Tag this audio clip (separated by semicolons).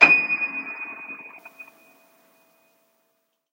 keys; piano; reverb; sustain; old; complete; notes